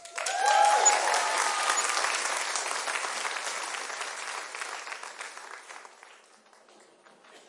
Small audience clapping during amateur production.
applauding, Applause, Clapping, concert, theatre, audience